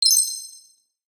UI Confirmation Alert, C1
Experimenting with the Massive synthesizer, I created some simple synths and played various high pitched notes to emulate a confirmation beep. A dimension expander and delay has been added.
An example of how you might credit is by putting this in the description/credits:
Originally created using the Massive synthesizer and Cubase on 27th September 2017.